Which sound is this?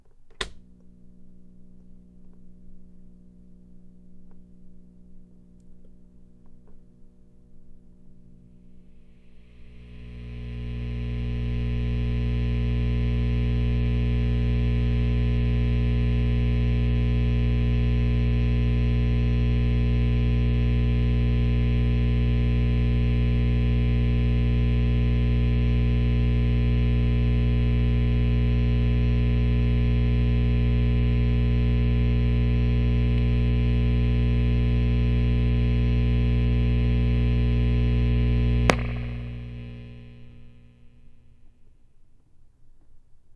Tube Amp turning on
Tube amp switches on and warms up, then shuts off.
amp, guitar, instrument, tube-amp, buzz